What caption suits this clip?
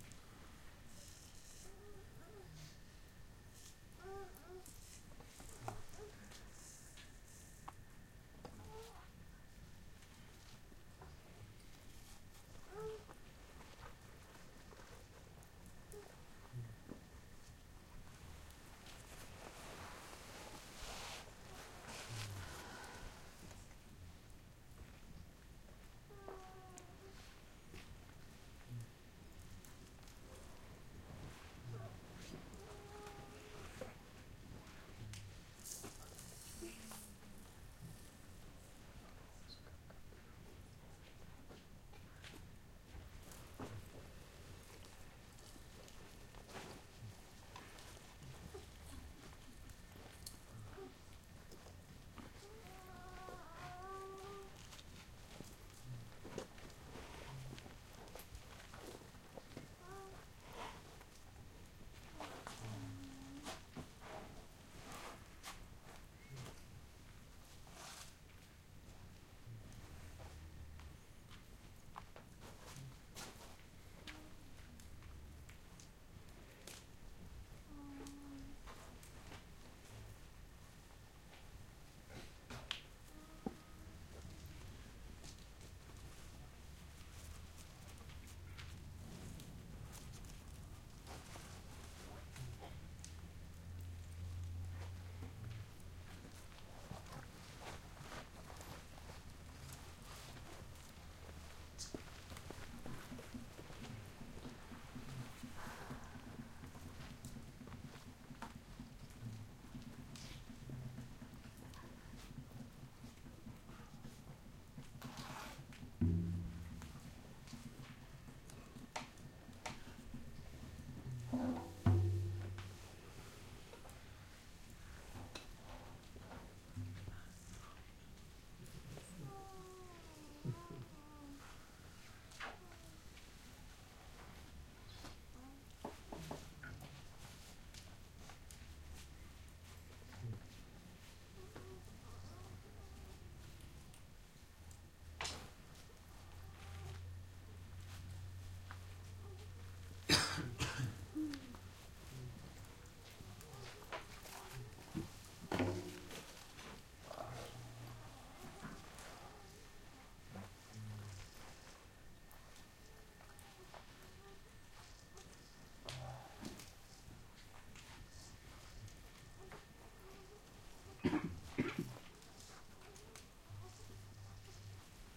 campsite night hut crickets quiet movements around sleeping people1
campsite night hut crickets quiet movements around sleeping people
campsite, crickets